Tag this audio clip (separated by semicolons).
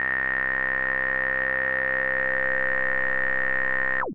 multisample; square; subtractive; synth; triangle